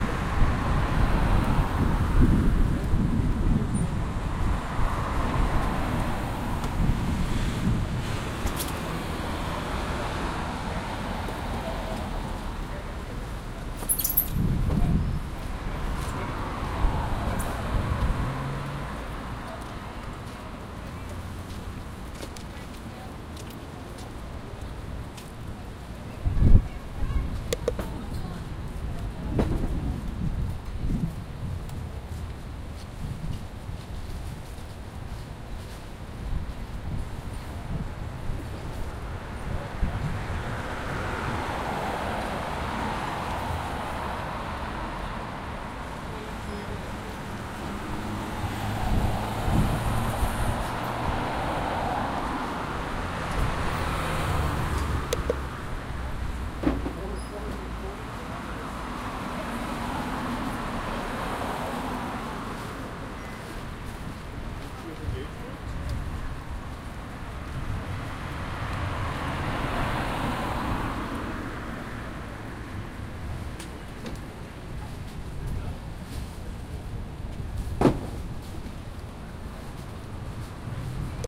city street
this was a street on a city
it was hard to control interference sounds